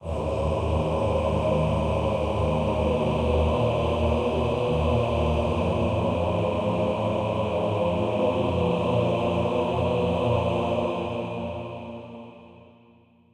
ch7 90bpm
These sounds are made with vst instruments by Hörspiel-Werkstatt Bad Hersfeld